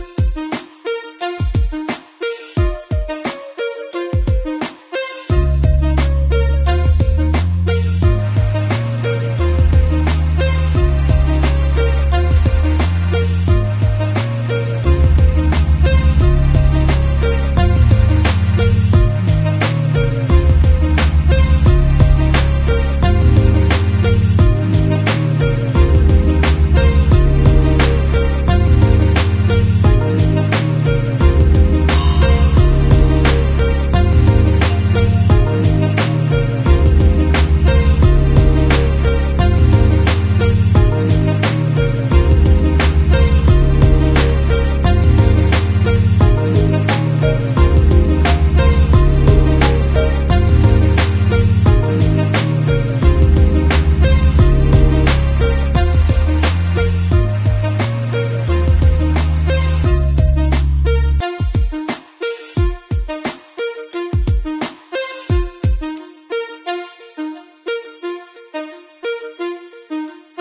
relaxing outro music use it for whatever you want to use it for!
made with splash